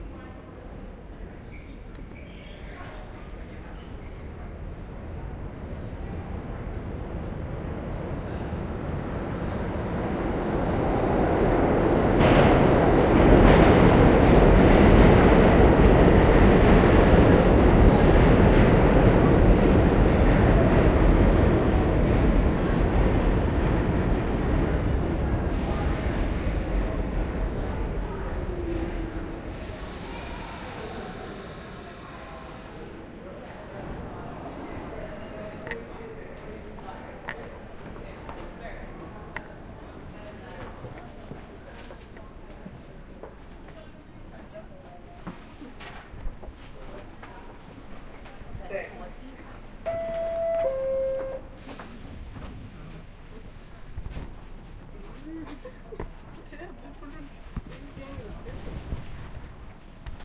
Subway train arrival, doppler, doors opening, underground, ambience, pressure release